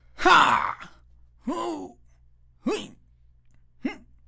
Pain noises
pain, scream, noise